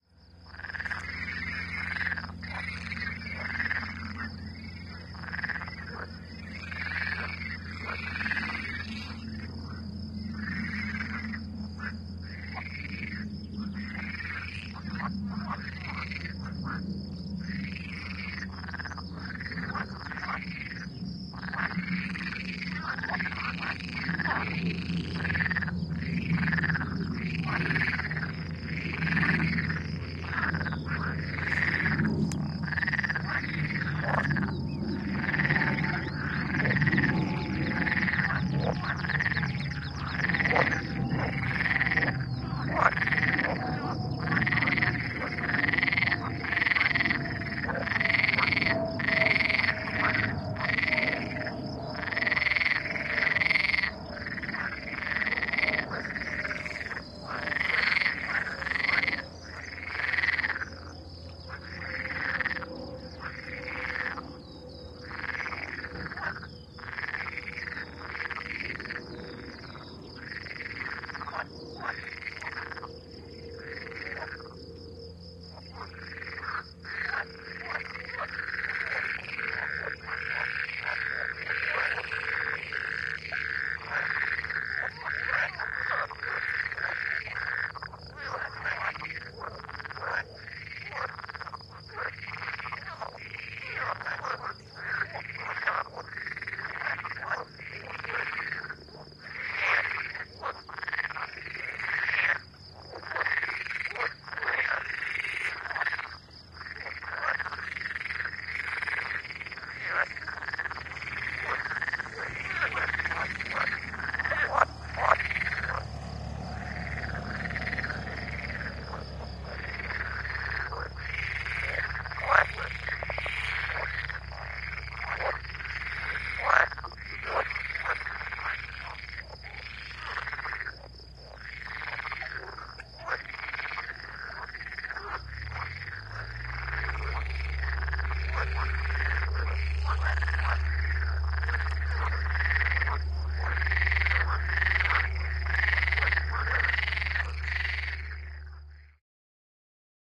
frogs airfield evening
Recorded in MS-Stereo, with Neumann RSM191. Recorded next to an airfield, you hear sports airplanes starting and landing in the background, while hundreds of frogs are giving a nice concert in the evening.